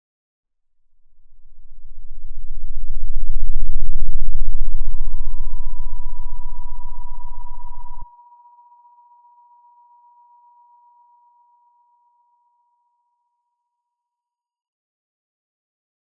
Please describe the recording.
Auditory Hallucination
THE DARK FUTURE
Dark Suspenseful Sci-Fi Sounds
Just send me a link of your work :)
Sound, scifi, ringing